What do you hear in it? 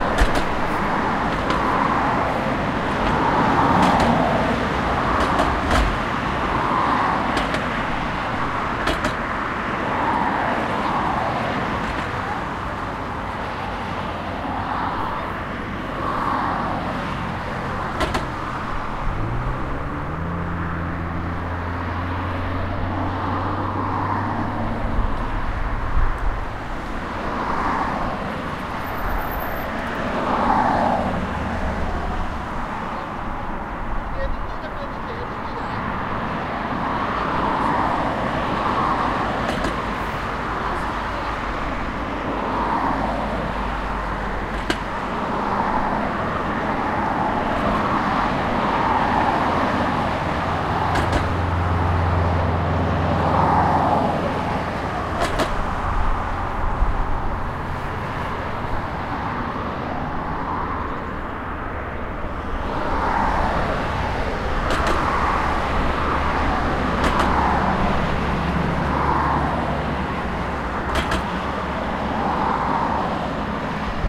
traffic sounds002
recorded on zoom h1. Cars, trucks, buses going over a metal hatch which is causing the diff doff sound. Traffic was going from right to left
ambience, field-recording, traffic